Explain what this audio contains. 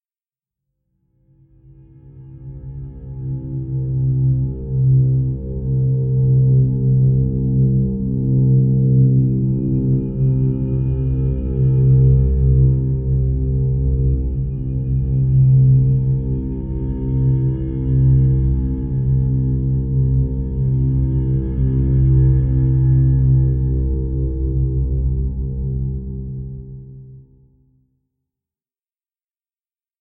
Pad 008 - La Doceur - C3
This sample is part of the “Pad 008 – La Doceur” sample pack. Nice pad, quite soft. The pack consists of a set of samples which form a multisample to load into your favorite sampler. The key of the sample is in the name of the sample. These Pad multisamples are long samples that can be used without using any looping. They are in fact playable melodic drones. They were created using several audio processing techniques on diverse synth sounds: pitch shifting & bending, delays, reverbs and especially convolution.